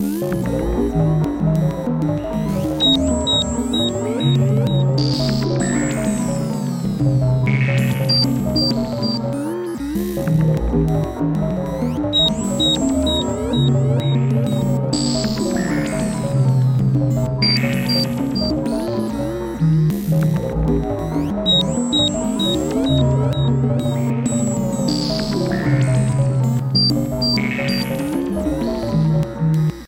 Alien bleep music - 15.12.2015
A bunch of weird and hopefully interesting noises.
Created using the Korg Electribe 2 synthesis engine with alot of modulations and asynchron patterns.
Post-processing in Cubase
It's always nice to hear what projects you use these sounds for.
One more thing. Maybe check out my links, perhaps you'll find something you like. :o)
60s, alien, beep, bleep, blip, bloop, comedic, electronic, evolving, experimental, funny, modulated, noise, organic, radiophonic, retro, ScienceFiction, sci-fi, SFX, soundscape, space, spacey, strange, synth, weird